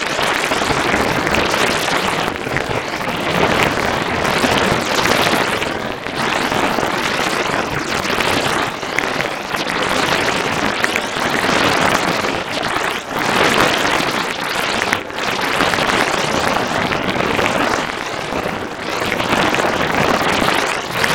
Ions battering the dome of consciousness.